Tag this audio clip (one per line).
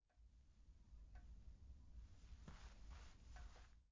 pick-up
bag